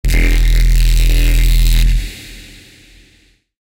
Bass filth
Bass, filthy, neuro